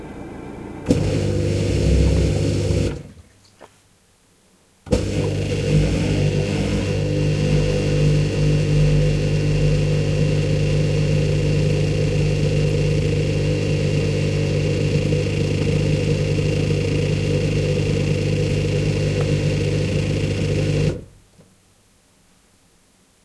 Mono recording of a dishwasher with a contact microphone